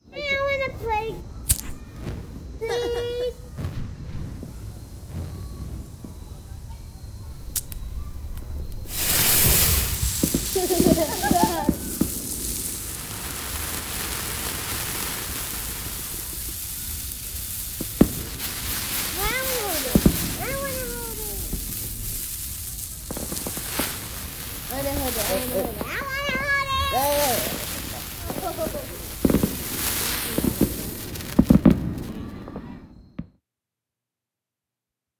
July 4th, sparklers, kids, fireworks
kids, sparklers, july-4